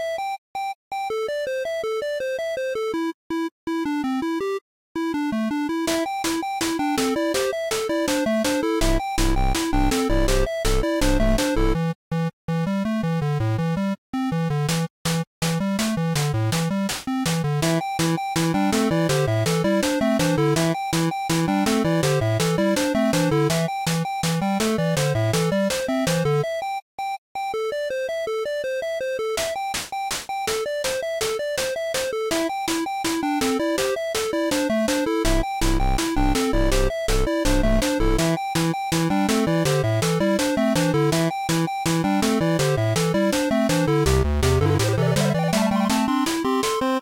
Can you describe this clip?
Pixel Song #8
free, Loop, music, Pixel